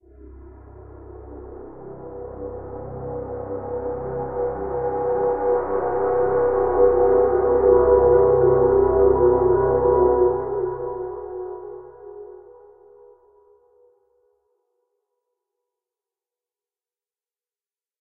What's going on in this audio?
A slowly crescendoing, sinister sounding ambience. Recorded with Ableton.